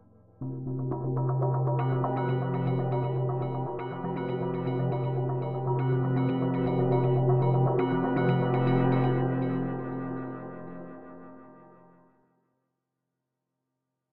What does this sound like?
key seq 17
A short synth keyboard sequence at 120 bpm. Part of a pads and keys sample pack.